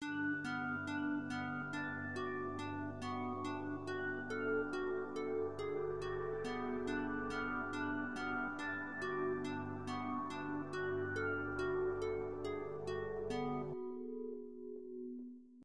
Created on my Yamaha keyboard and mixed in my roland vs-840 in the 90's